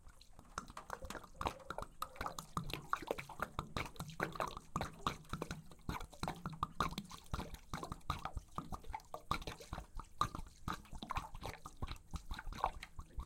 Big Liquid Gurgle Pour Splash FF205
Water pouring, water jug, slow, robust gurgle, sloshy, glug